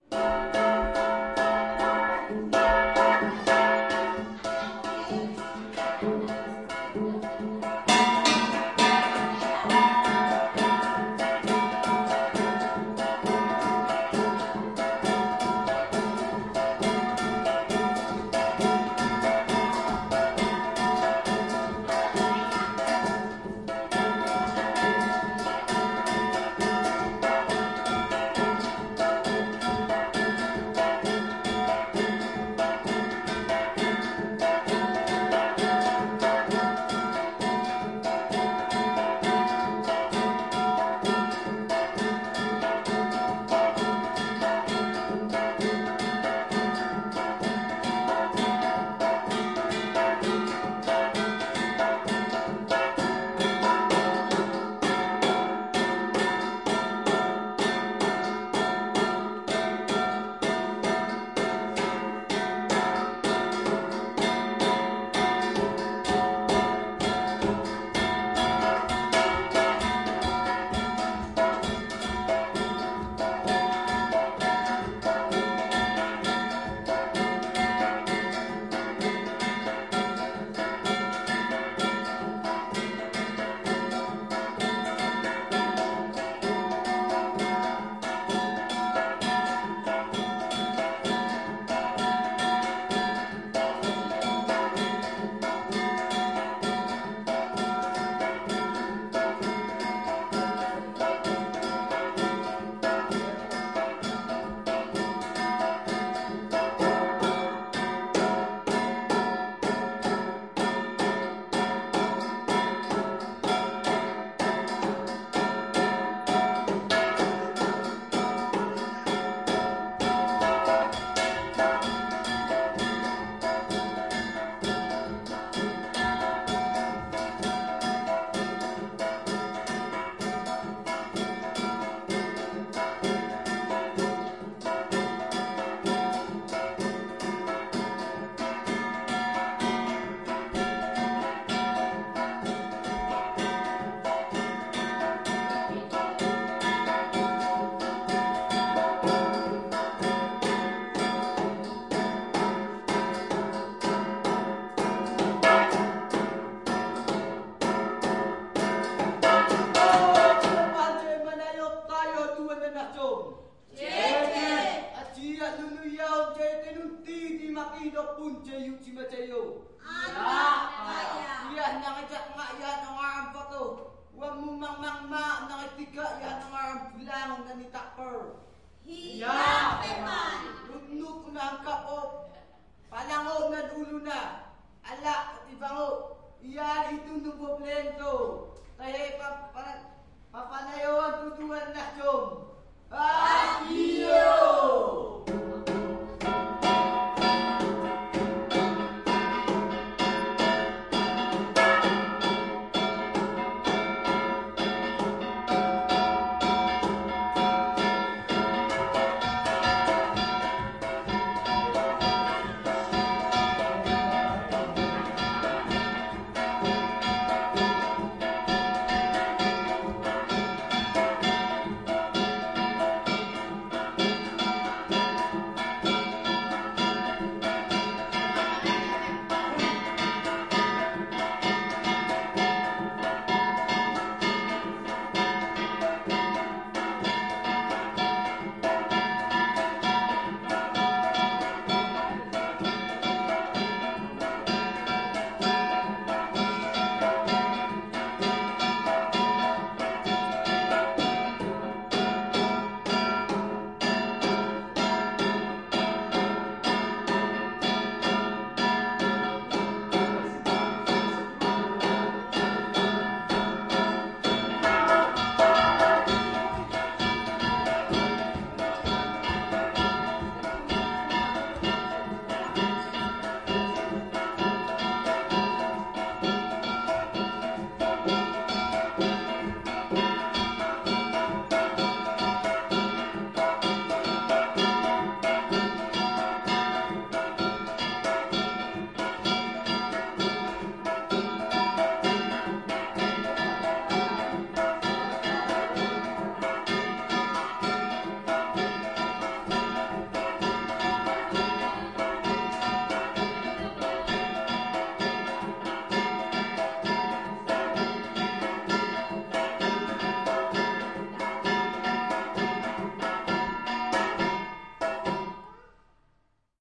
VOC 150310-0958 PH EthnicMusic
Traditional music and dance performed by native people from Banaue (Philippines).
Recorded in March 2015 in Banaue (famous place for its beautiful rice terraces in Philippines).
Recorder : Olympus LS-100 (internal microphones)
Banaue, Batad, dance, drum, drums, ethnic, gong, Ifugao, instruments, language, native, percussions